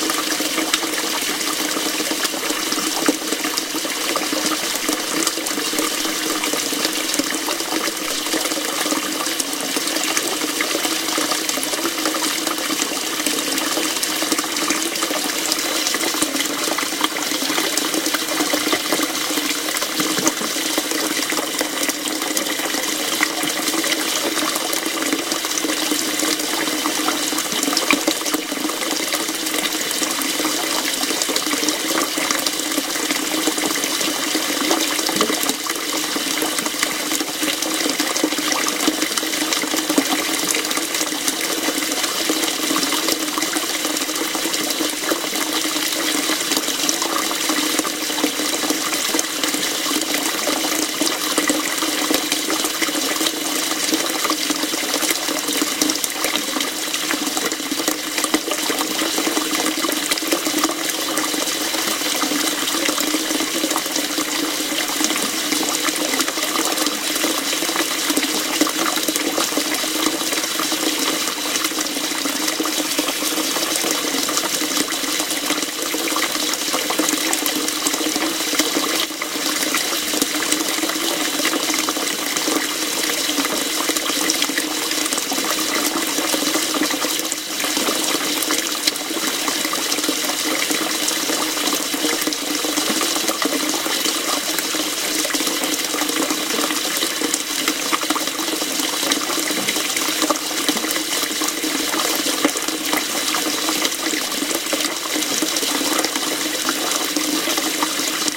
water with way more burble
Recorded with Audio Technica 835b shotgun mic to Sony MZ-NH700 Hi-MD recorder and captured analog onto an imac. This puts my 3 month old baby to sleep. Good-night.
water, kitchen, running